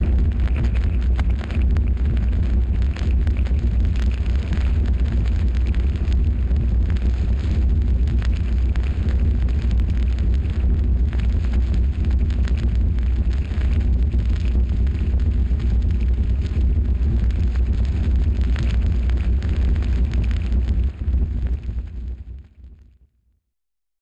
ire: i took some vinyl crackles that i filtered with a matching eq with witch i took the borrow of a natural fire sound that i loved .Then i draw a new waveshape for the crackle.
i mixed it with a natural wind noise that i passed into an auto pan
effect .i tried to equalized it to mix it with the waveshape crackle to obtain an homogeneous sound ... the wind try to follow the crackle :-). i put an EQ on the master to filtered the noise i don't want in the wind (The whistling) and Highlight the big crackle
it was all mixed and processed in ableton live with a little finalisation with peak and a limiter.
bun, fire, faya